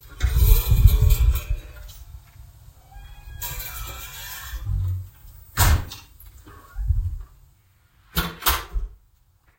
Screen door with spring opening and closing
Screen-door-with-spring, screen-door-opening-and-closing, Screen-door